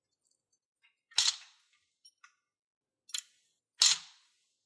weights machine
gym, machine, weights